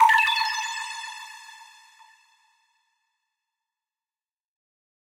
power up2
Small power-on notification sound.
ascending, game, harsh, increase, notifycation, power-up, sweetener, ui